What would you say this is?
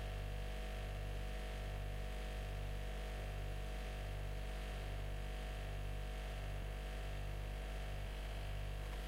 Fridge Humming
The exterior humming of a refrigerator. Recorded with a Zoom H2n.